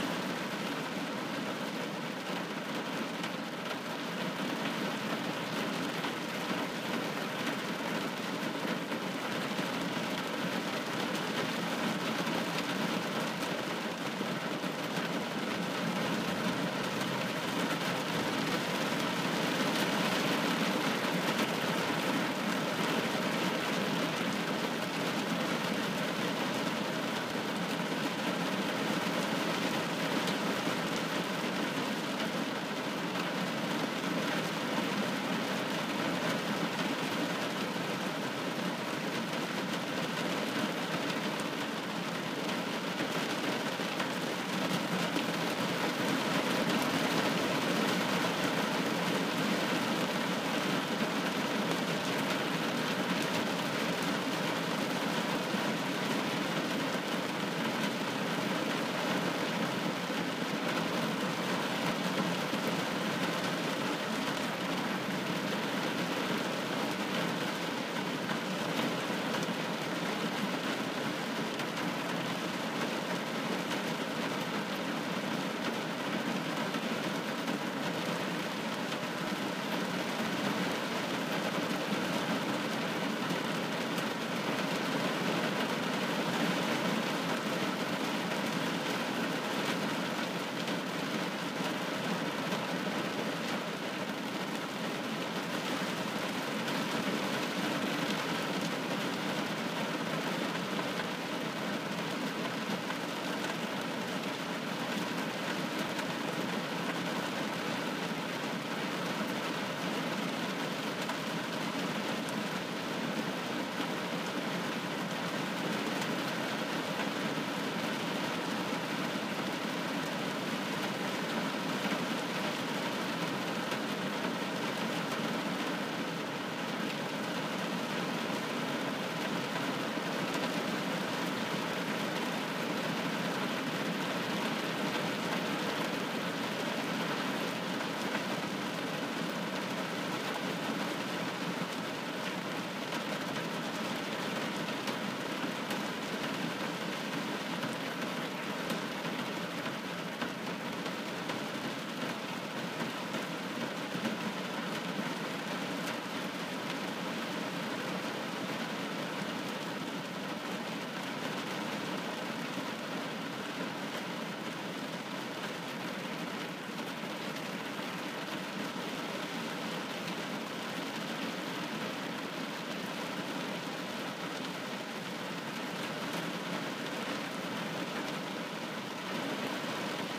Rain Interior Car
Heavy rainstorm inside car. Microphone close to windshield more than roof of car. Parked on suburban street. 2-3 cars pass during recording.
field-recording, rain, interior, atmosphere, weather, morning, car, suburban, background, water